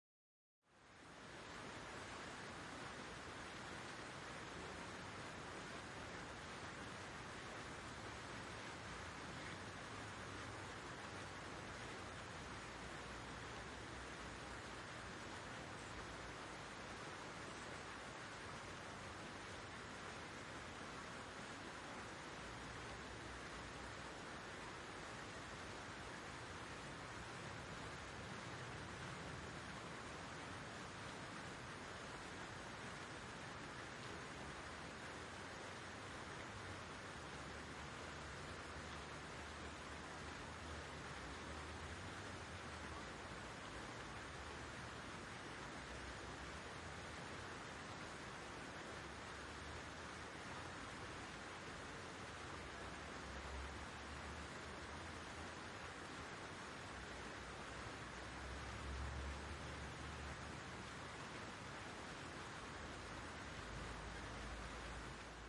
Water Fountains, Berlin Märchenbrunnen - CLOSE perspective
Recording of the "Märchenbrunnen" in Berlin, a basin with multiple smaller fountains.
CLOSE perspective (far one is available as well)
Recorded with a ORTF pair of MKH40 on a SD744T.
splashing, water-fountain, water, fountain, basin, park, fountains, far